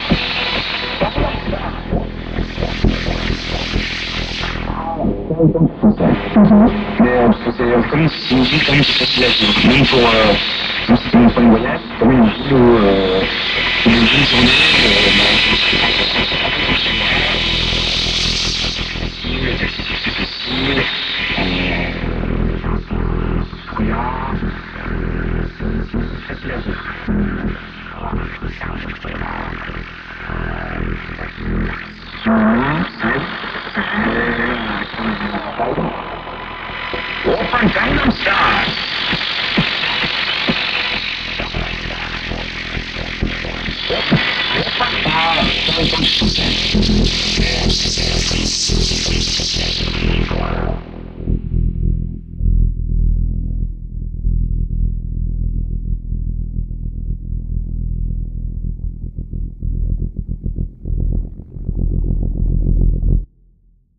Le Radio
While experimenting with Ableton's external effect support I plugged a Telecaster in to a home made fuzz factory clone and routed it through a Nord Modular and Moog Minitaur. Then the Telecaster started picking up French radio and this happened.